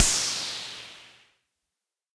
electro harmonix crash drum